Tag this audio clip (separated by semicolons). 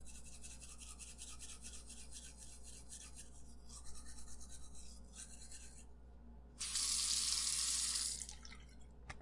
toothbrush; teeth; toothpaste; tooth; brush; brushing; paste